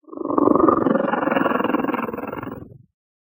Speech - AlienSpeech2
my own voiced growl heavily processed, alien or dinosaur etc
speech, voice, dinosaur, space, Alien